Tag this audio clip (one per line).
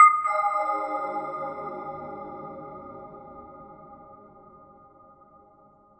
electronic
witch
rpg
free-game-sfx
magician
effect
magic-touch
fairy
adventure
game
magic
fantasy
game-sound
wizard
magical
game-music
spell